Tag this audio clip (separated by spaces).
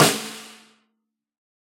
snare 1-shot velocity drum multisample